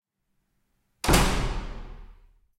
big close echo 01
recording of closing a wooden apartment door. there is a bit of echo from the corridor. recorded using zoom h4n